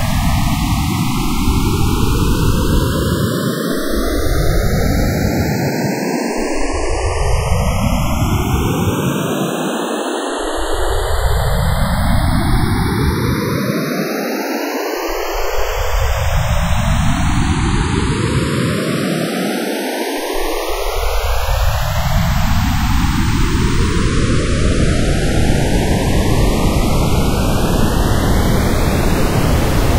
Coagula Science! 11 - Noise UFO Liftoff
A friendly starship liftoff sequence.
Made in Coagula.
science-fiction, space, spaceship, space-travel, stars, starship, ufo, unidentified-flying-object